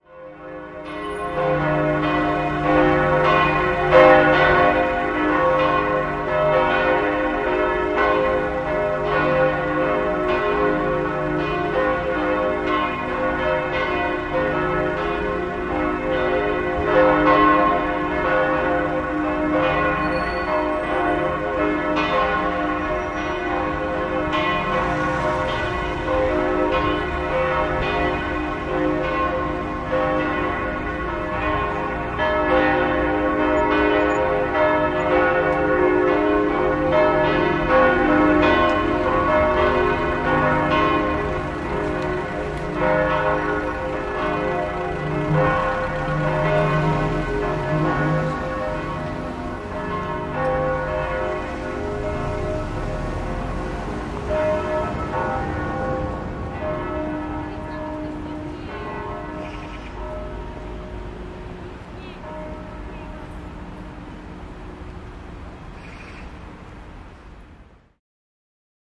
Bells in Elbląg - Recording of the city soundscape with bells in the first place, taken in Elbląg (Poland).
dome, church, cathedral, ring, church-bell, bell, bells